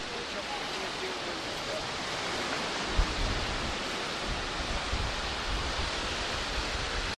newjersey OC musicpierrear monp
Monophonic snippet from the ocean side of the music pier on the boardwalk in Ocean City recorded with DS-40 and edited and Wavoaur.
music-pier, boardwalk, field-recording, ocean-city, ambiance